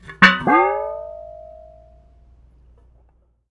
canpop12sequence
Pringle can recorded from inside and out for use as percussion and some sounds usable as impulse responses to give you that inside the pringle can sound that all the kids are doing these days.